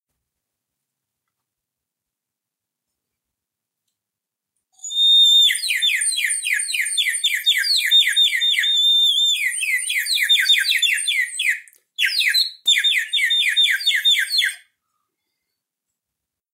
This is a bird whistle toy made of clay. You fill it with water to create the slurping, chirping effect.